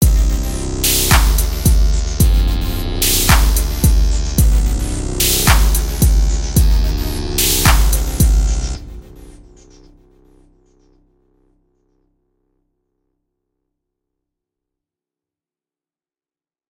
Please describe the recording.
2. part of the 2013 rave sample. Rave techno like instrumental loop